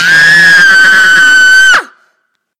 Young girl Screaming
yell, female, woman, 666moviescreams, agony, girl, scream, voice